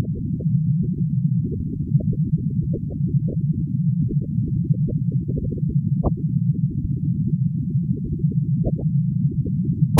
Read the description on the first file on the pack to know the principle of sound generation.
This is the image from this sample:
processed through Nicolas Fournell's free Audiopaint program (used the default settings).